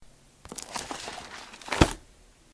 Book open
This is when I opened a Santa Biblia/Holy bible book up. A bit crackly. Recorded with a Sony ICD-PX333, at my house, on 5/24/17.
book, open, up